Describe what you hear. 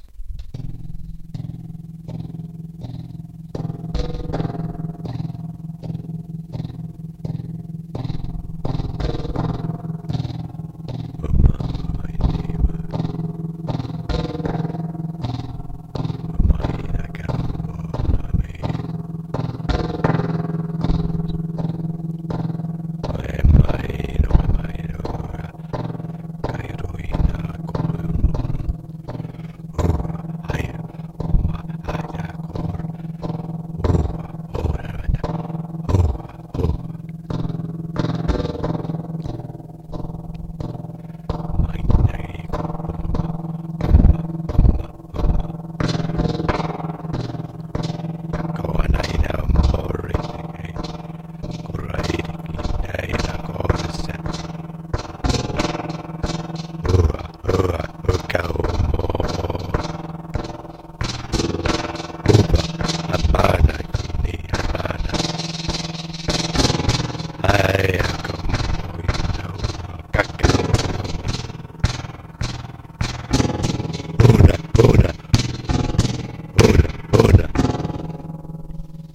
Mohawk singing and dancing about the big wolf hunting,